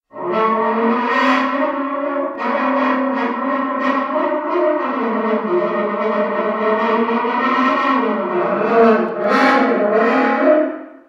Rhinoceros Trumpeting Angry
Rhinos do not actually trumpet, but in Ionesco's play Rhinoceros they do. This is the sound of a fictional trumpeting rhinoceros created using a French horn and some editing. This rhinoceros is very angry. Thanks to Anna Ramon for playing the french horn.
angry, attacking, animal